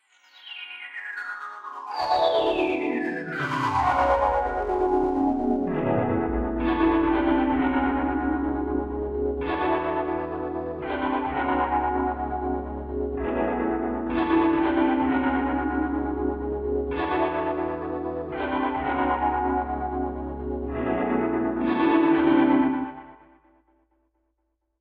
Contains two loop points for seamless looping.
Dream Sample Pack » DSV1_SuspensfulRhodes07_C#_72bpm by Djeuphoria
Chorus, effect, effects, electronica, FX, Horror, music, one, piano, production, Reverb, Rhodes, RnB, sample, short, shot, Smooth, Suspense, synth